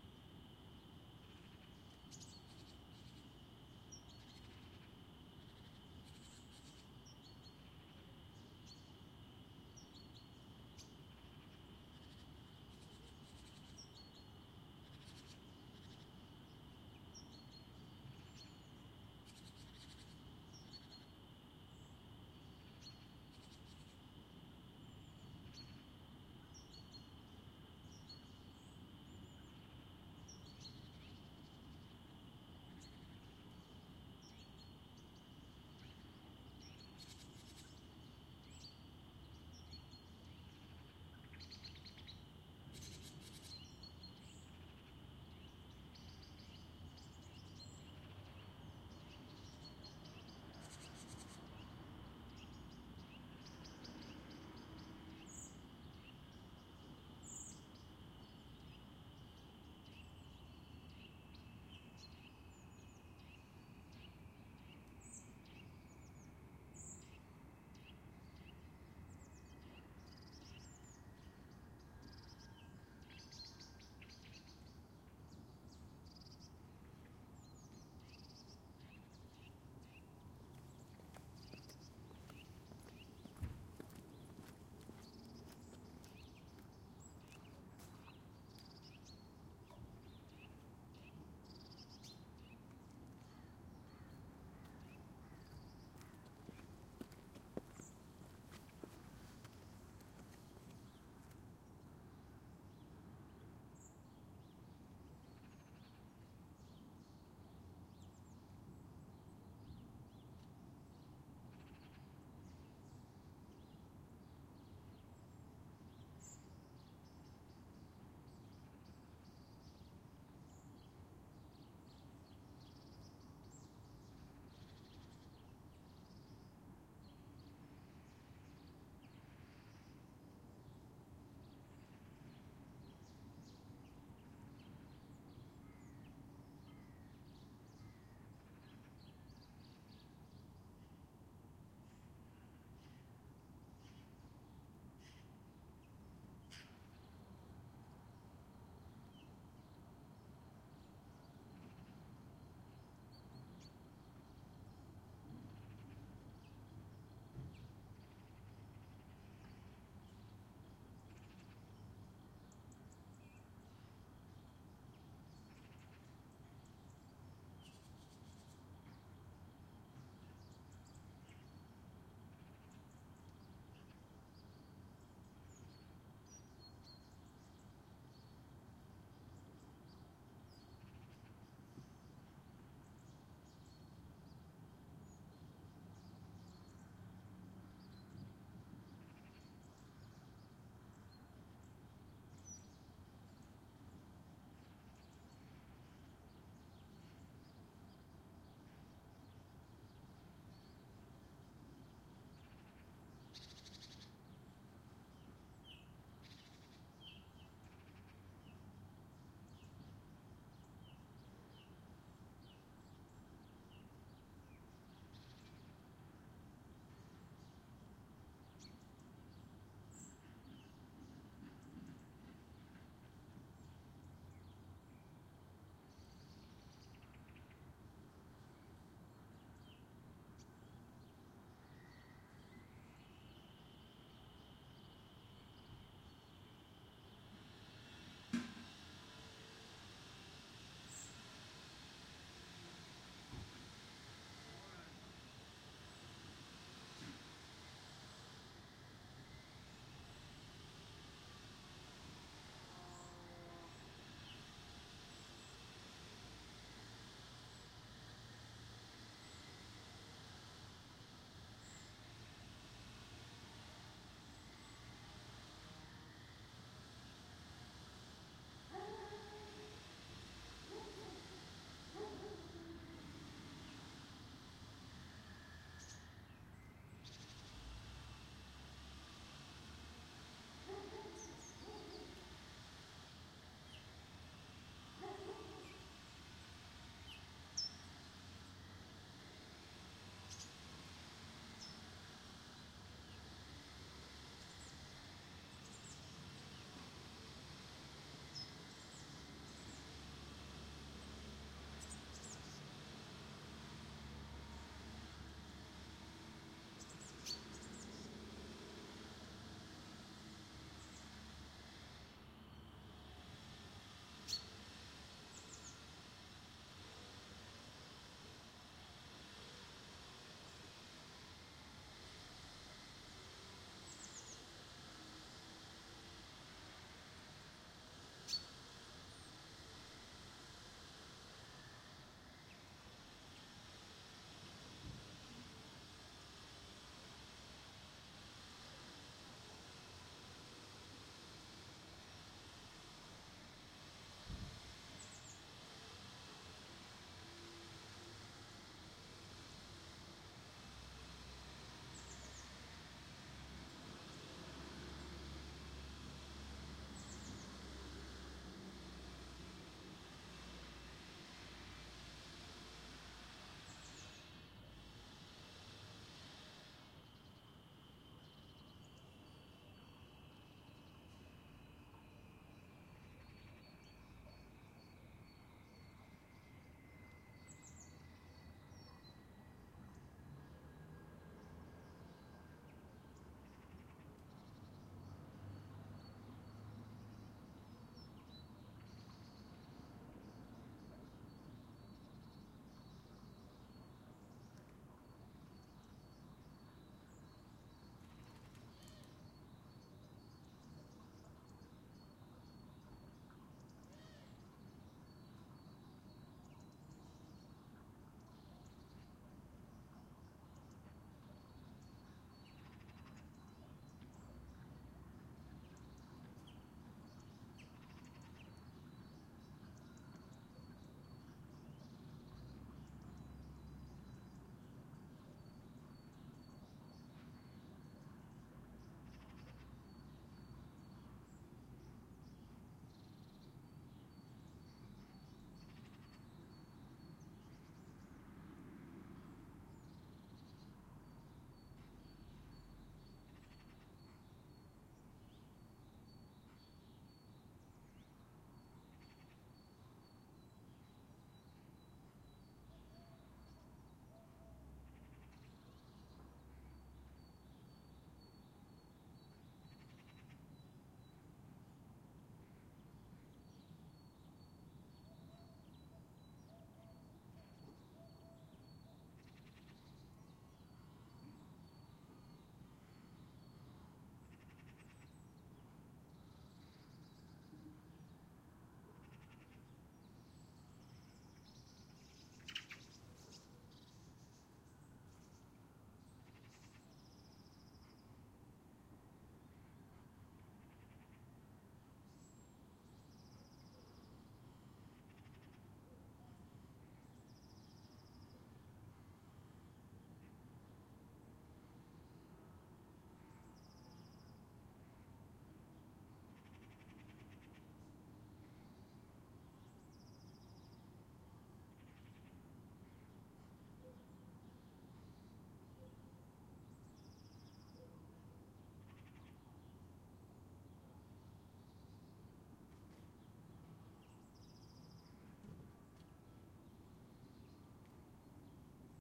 Stadt-Atmo-Kleinstadt-wenig-Verkehr-8min SR013Front-01
2013-01-13 Field-Recording, stereo, part of surround-recording, Zoom H2